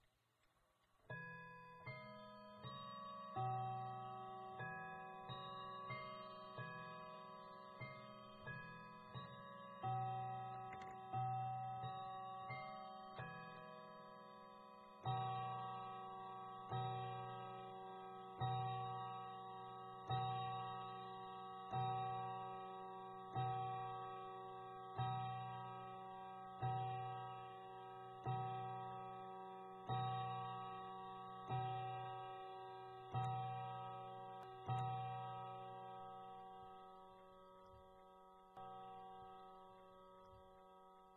Chime 01 Hour 13
Mantle clock chimes; striking 13 O'clock ;-). Recorded on Tascam DR-1 with Tascam TM-ST-1 microphone (13th hour added using Audacity 1.3 Beta (Unicode).
chimes, clock